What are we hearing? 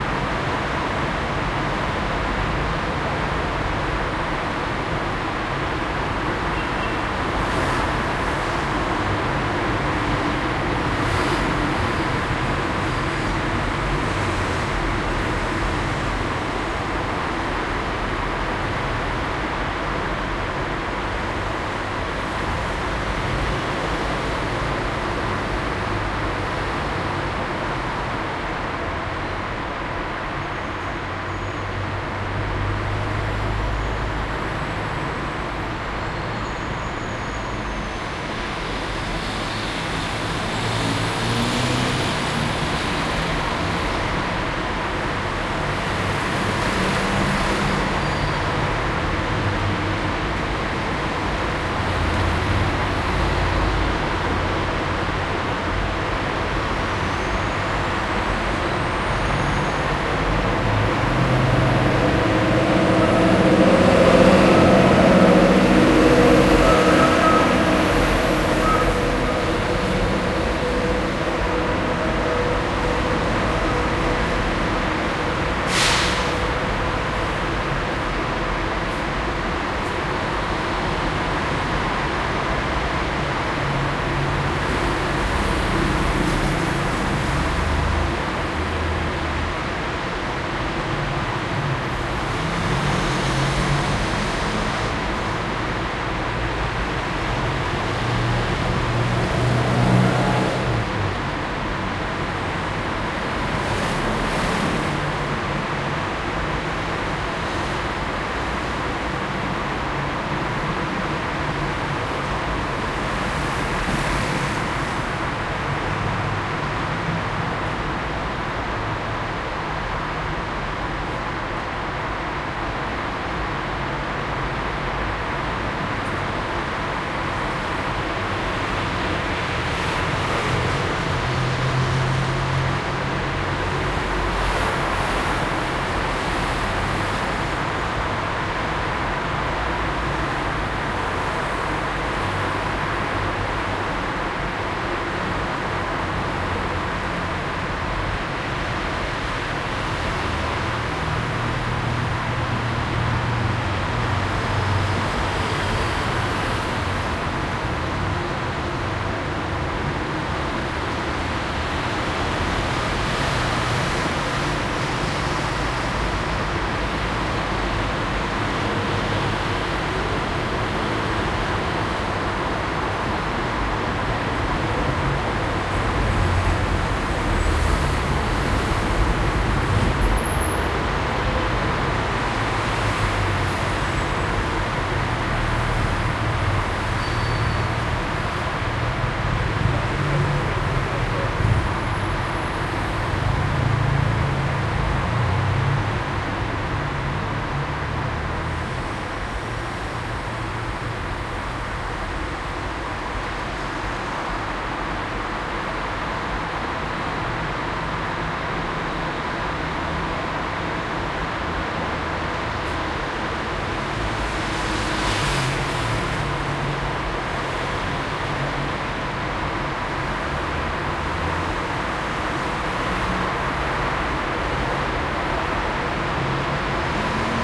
Heavy traffic, Rome
Heavy traffic in the morning. Recorded with a Zoom H1 in Rome.
rush, hour, rome, noise